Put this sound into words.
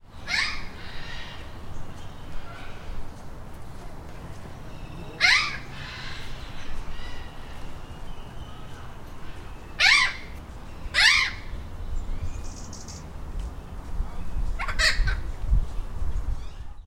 various exotic birds
Exotic paradise birds
exotic, birds, jungle